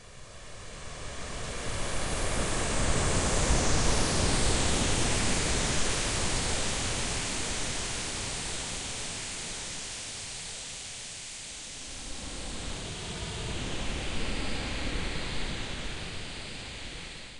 Radio Waves Loop
wave, sea, loop, radio, shoreline, simulation